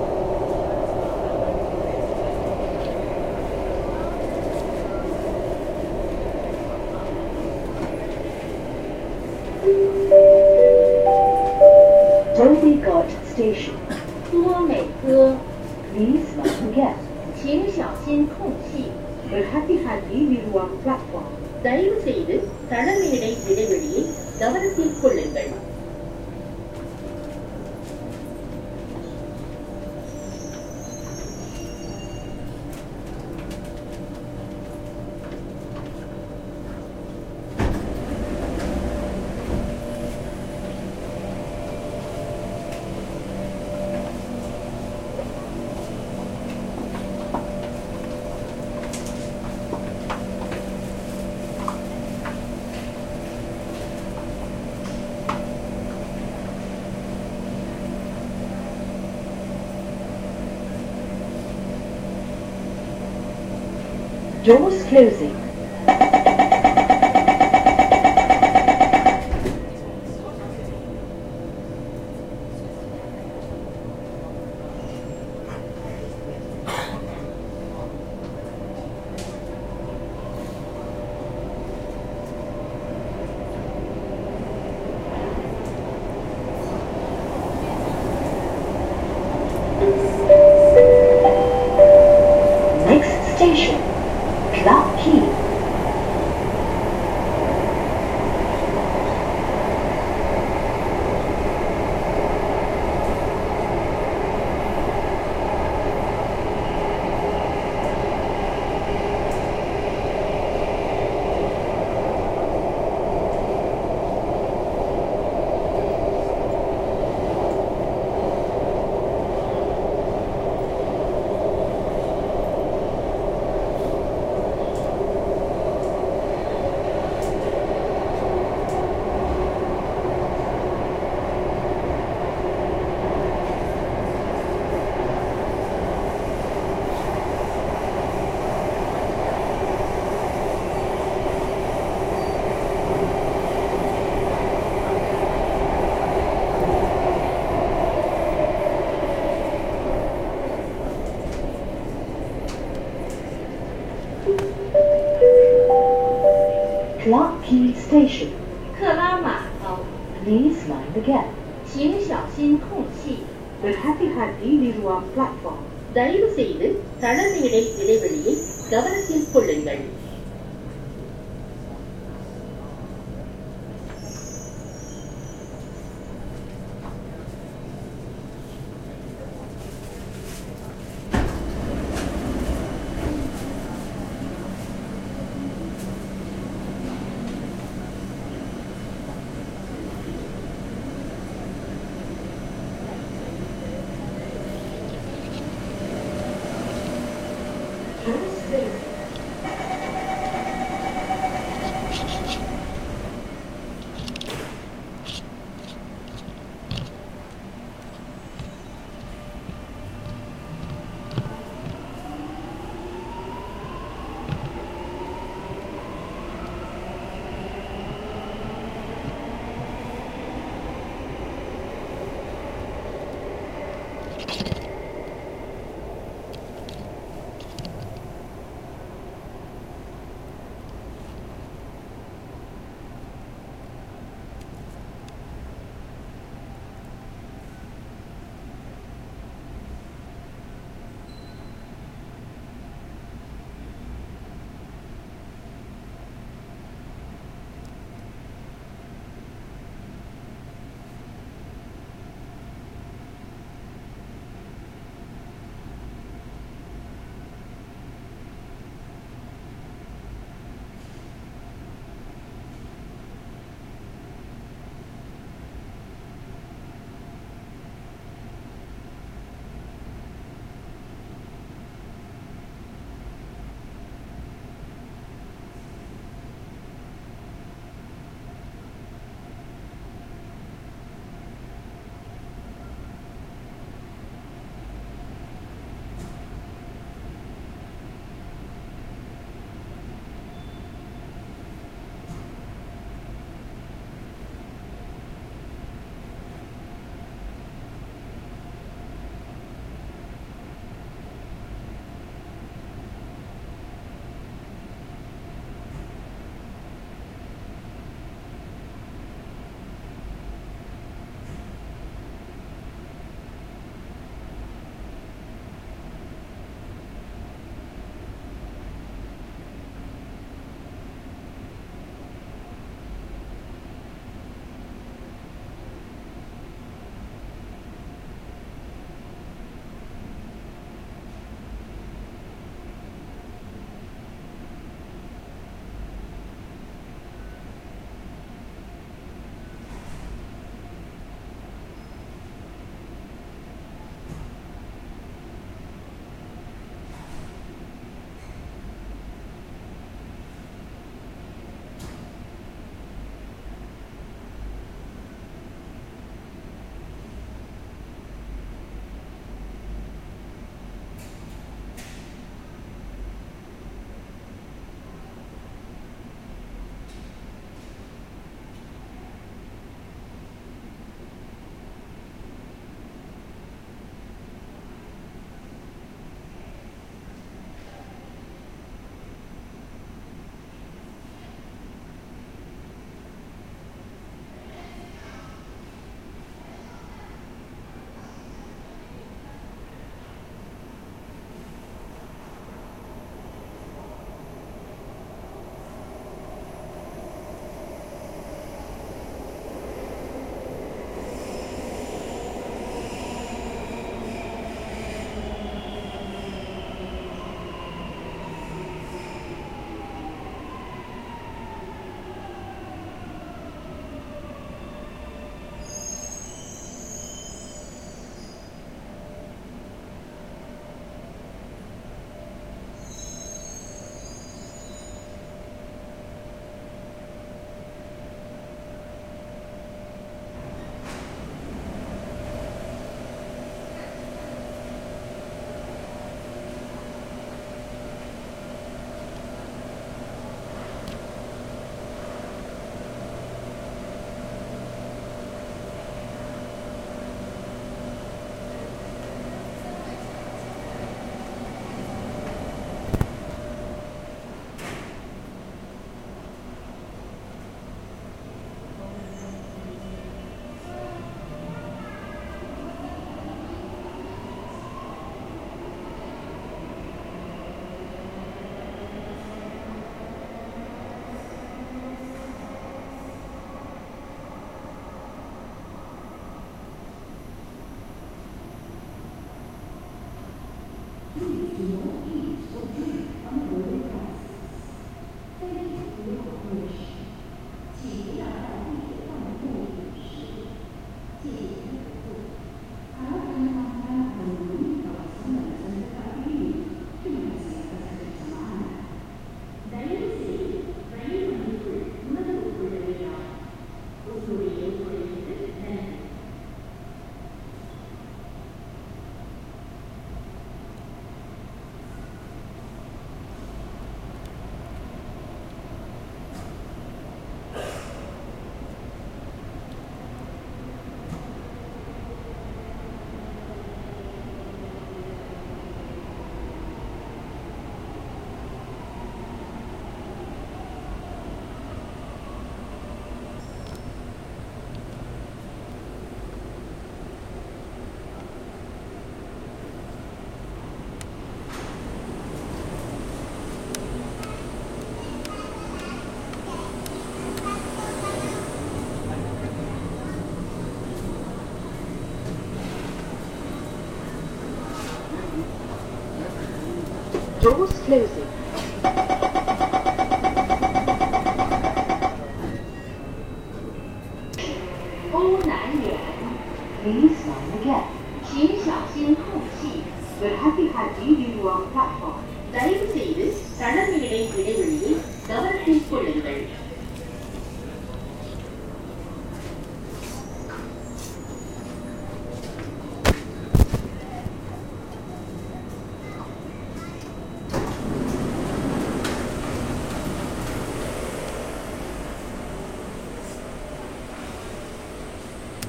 singapore-mrt2
Sounds captured from inside the purple line:
Includes:
Doors opening and closing. Train moving.
Announcements:
Dhoby Ghaut Station (+mandarin)
Please mind the gap (4 languages)
Doors closing
Next Station Clarke Quay
Please do not eat or drink on the railway premises, thankyou (four languages: English, Mandarin, Malay, Tamil)
Outram Park Station in Mandarin
bell, chinese, clarke-quay, dhoby-ghaut, ding-dong, doors-closing, english, indian, malay, mandarin, mrt, outram-park, singapore, tamil, train